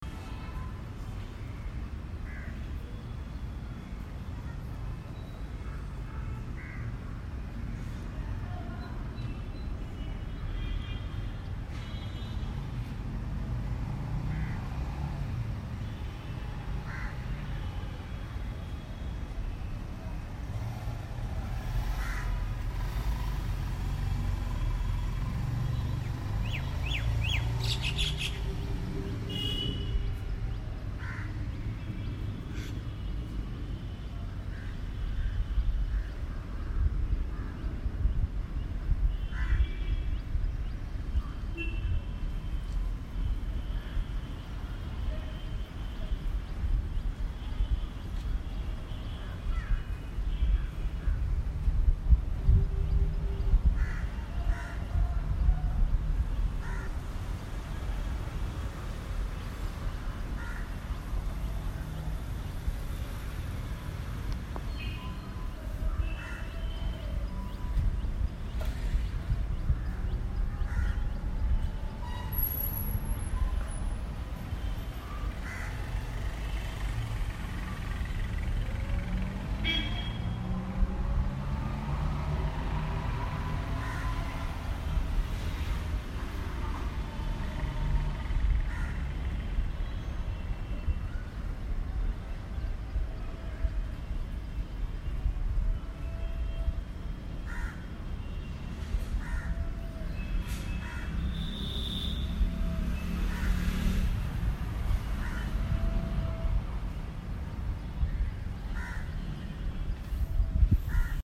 Exterior Residential Area Ambiance Bangalore India
Exterior ambiance captured at 7:30 am in a residential neighbourhood in Bangalore, India. Some birds, light passing vehicles, vendor shouting at a distance.